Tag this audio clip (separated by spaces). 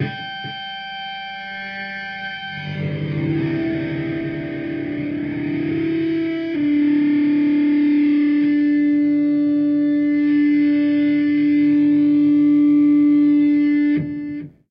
guitar feedback